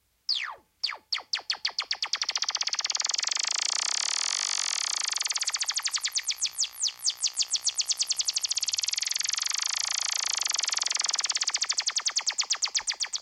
Monotron LFO rate
Sound effects created with Korg's Monotron ribbon synth, for custom dynamics and sound design.
Recorded through a Yamaha MG124cx to an Mbox.
Ableton Live
Ableton Live
korg, Monotron, Sample, sampler, sfx, sound, synth